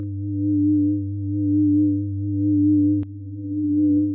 modified dtmf tones, great for building new background or lead sounds in idm, glitch or electronica.
electronica, idm, dtmf, glitch, eerie